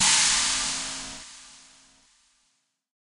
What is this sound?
swish crash, swish knocker, swish, swedish, svenska, metal, rock, crash, drums,
drumkit, drum-kit, drum percussive percussion